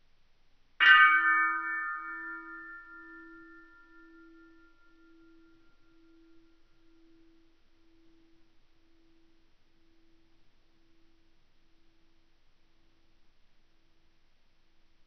This version is 70% slower than the original. Edited in Audacity 1.3.5 beta
ding, brass, bing, bell